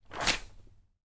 Page Turn 16
23/36 of Various Book manipulations... Page turns, Book closes, Page
read, book, magazine, flip, flick, reading, turn